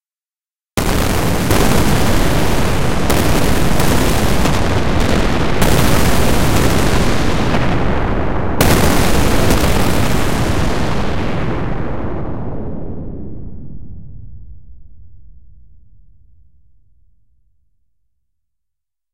spaceship explosion2
made with vst intrument albino